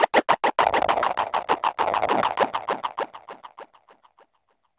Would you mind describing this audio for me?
This is what happens if you put two sine waves through some severe filtering with some overdrive and several synchronized LFO's at 100 BPM for 1 measure plus a second measure to allow the delays to fade away. All done on my Virus TI. Sequencing done within Cubase 5, audio editing within Wavelab 6.